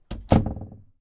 Shed Door 12
A wooden door being closed, with a little rattle in the doorway as it shuts.